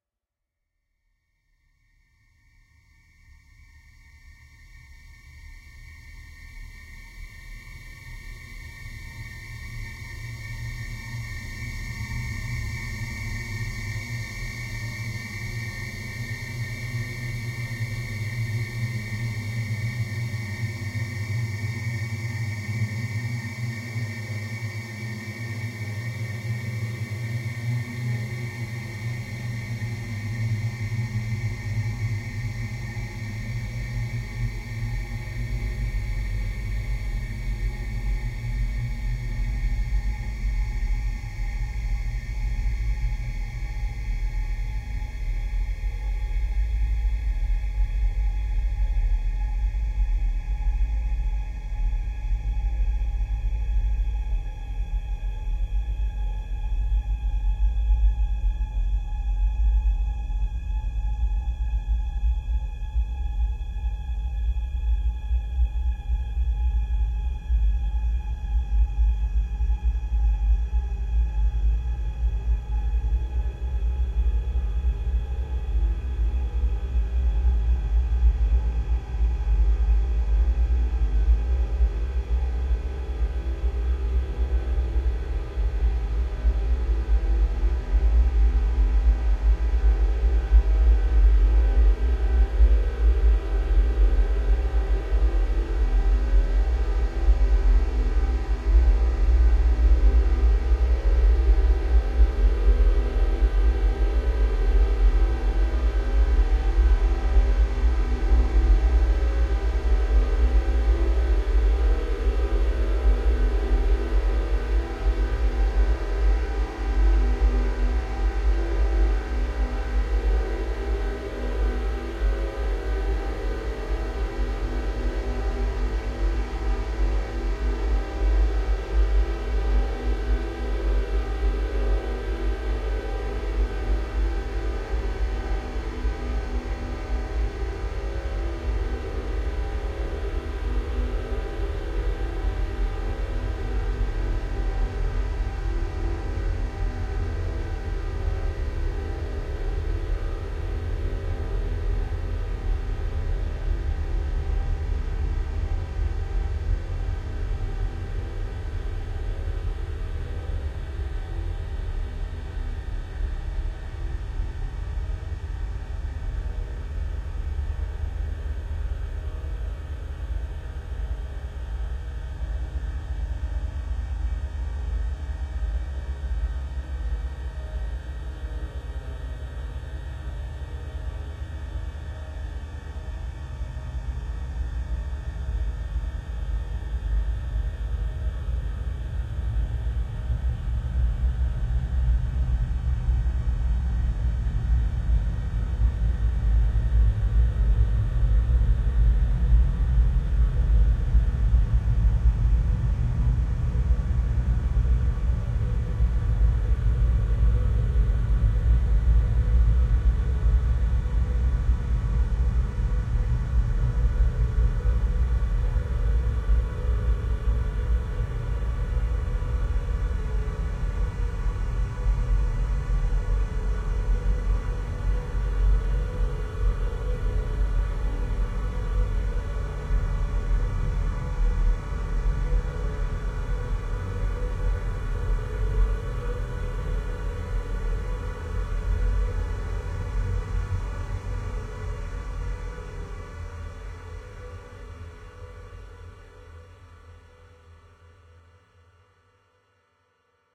Dark evolving drone with sound of machines.